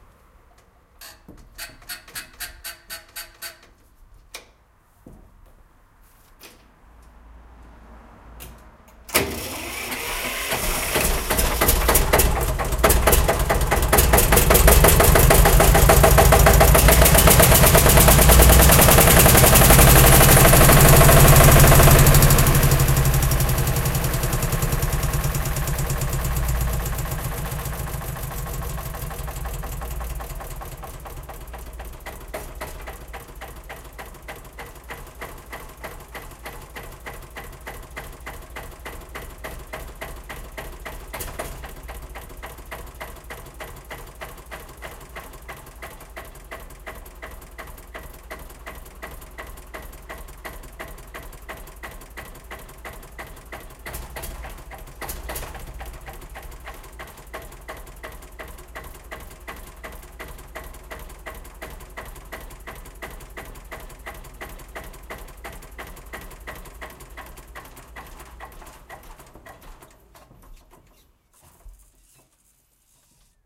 sound of an old Eicher tractor
the model is ED 13/Ie (a) from 1957
one cylinder diesel engine
air cooled
10 kW
1,29 litre engine displacement
recorded in a barn
sound-museum, machine, tractor, field-recording, Eicher, engine